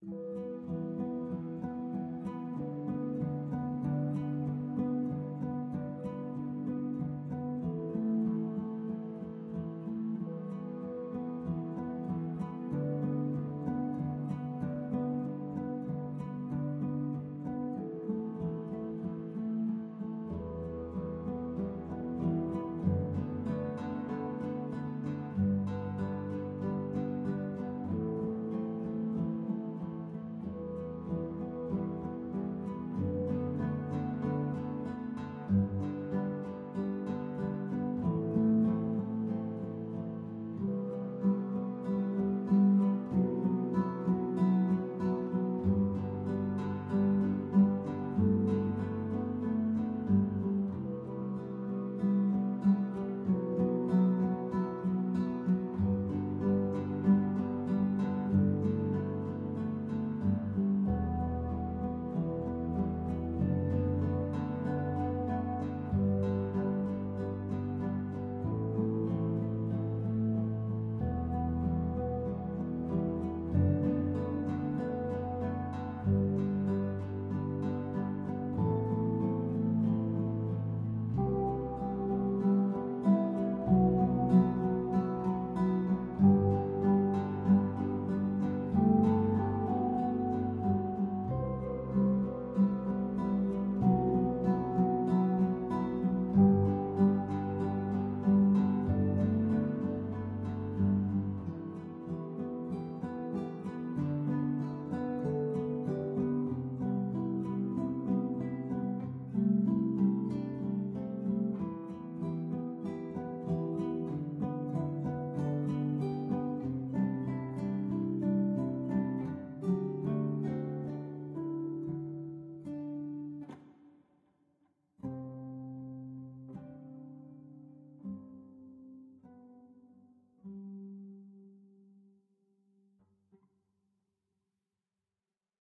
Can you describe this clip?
Genre: Emotional.
Track: 74/100
Emotional Guitar Sound